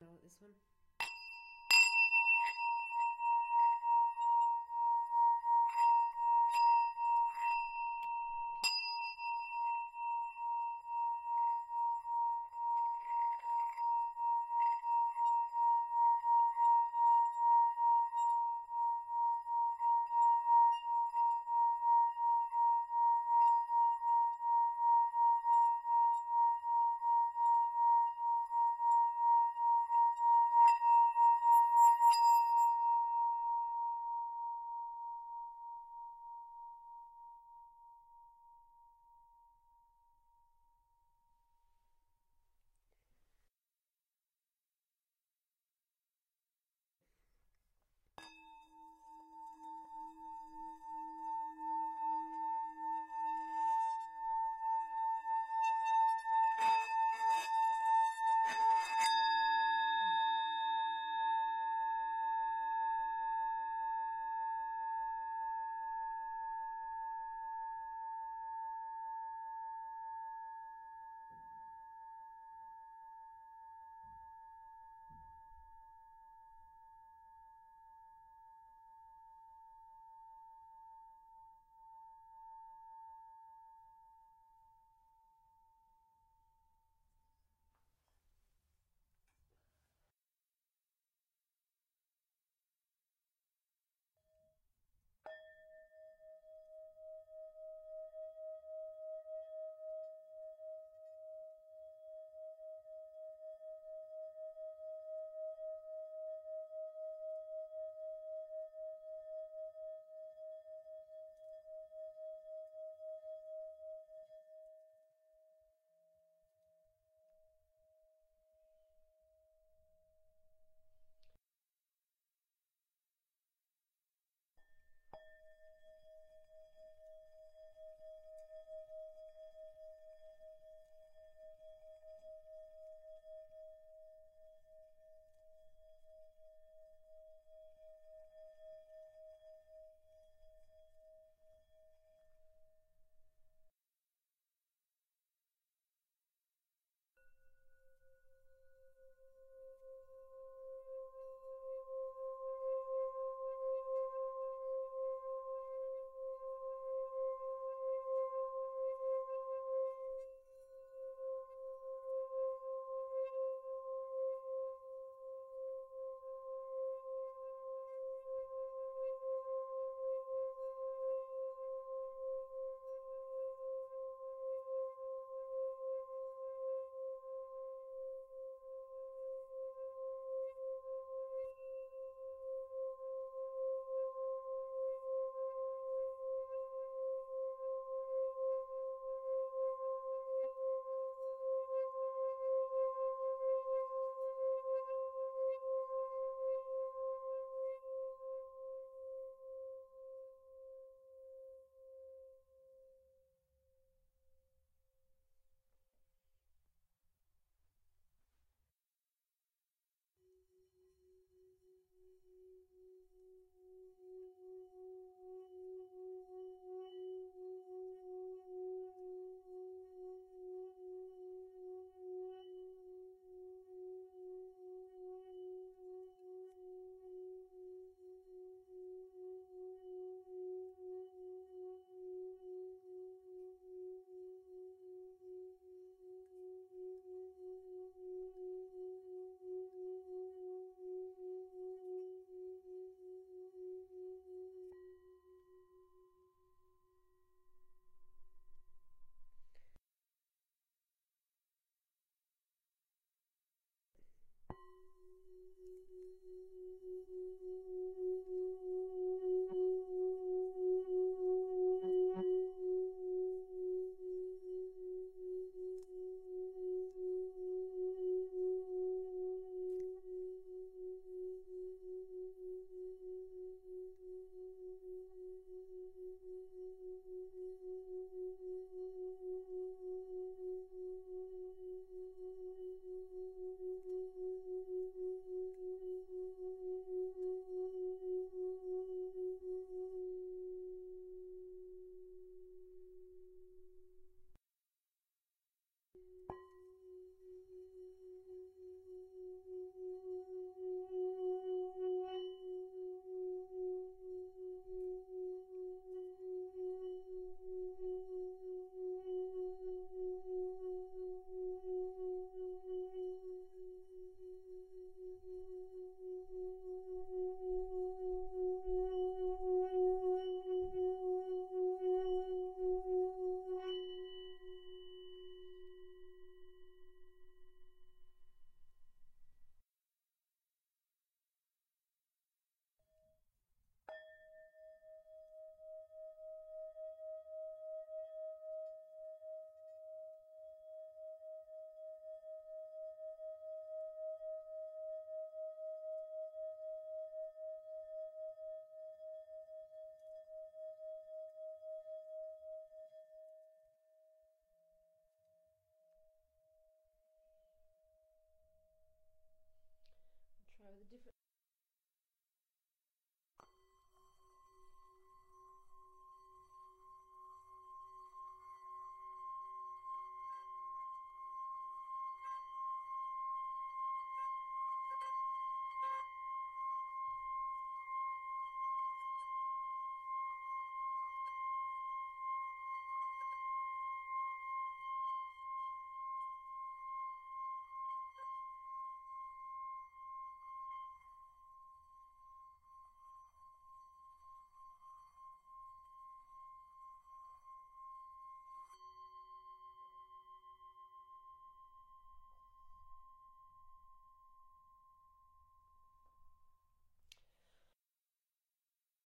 bowl rim plays 2
Mixed pitch / semi pitched Tibetan bowl percussion strikes,and rim plays
Tibetan-Instruments, Bowls, Tibetan, healing